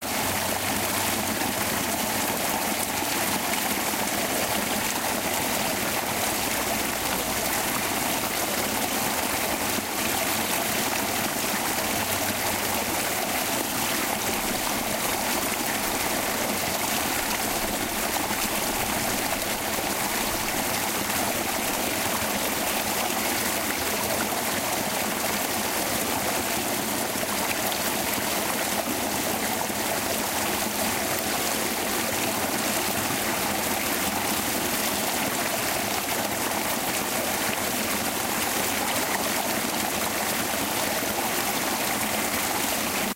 Recorded on a sunny day in southern Arizona hillside near Box Creek Cayon using a ZOOM 2
babbling, brook, Running, over, Stream, Splash, creek, rocks, Water